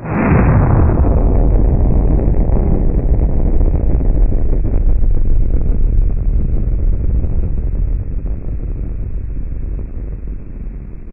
Explosion sound created at work with only the windows sound recorder and a virtual avalanche creation Java applet by overlapping and applying rudimentary effects. Lower pitch.